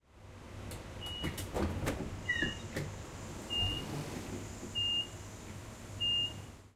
train door open 2a
The sound of an electronic door opening with warning beeps on a typical EMU train. Recorded with the Zoom H6 XY Module.